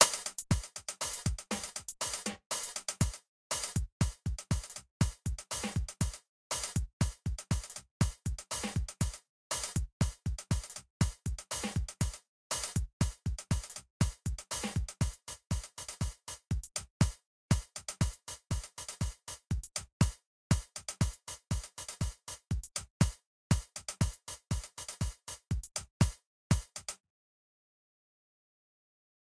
Drums track of Otwo instrumental